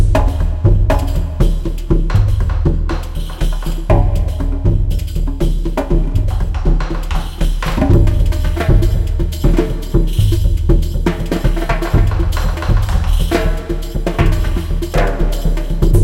Loop Jungle War Drums 02
A music loop to be used in fast paced games with tons of action for creating an adrenaline rush and somewhat adaptive musical experience.
game, gamedeveloping, games, indiedev, indiegamedev, loop, music, music-loop, videogame, videogames, war